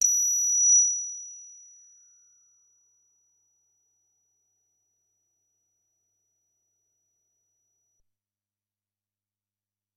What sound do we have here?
DDRM preset #14 - F9 (125) - vel 90
Single note sampled from a Deckard's Dream DIY analogue synthesizer that I built myself. Deckard's Dream (DDRM) is an 8-voice analogue synthesizer designed by Black Corporation and inspired in the classic Yamaha CS-80. The DDRM (and CS-80) is all about live performance and expressiveness via aftertouch and modulations. Therefore, sampling the notes like I did here does not make much sense and by no means makes justice to the real thing. Nevertheless, I thought it could still be useful and would be nice to share.
Synthesizer: Deckard's Dream (DDRM)
Factory preset #: 14
Note: F9
Midi note: 125
Midi velocity: 90